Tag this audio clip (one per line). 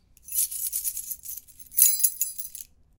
keys
searching
things